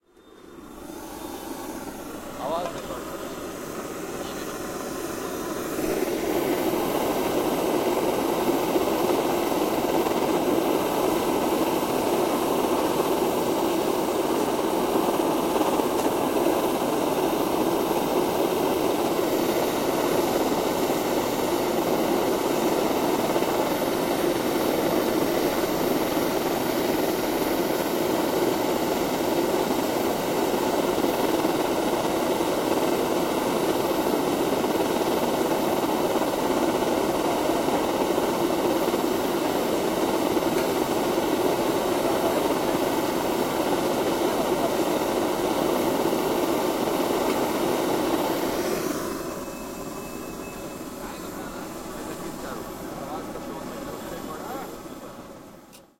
This was recorded at a street stall in Old Delhi. It's a gas stove used for cooking, very often for making chai. You can hear some conversations in the background.
gas, street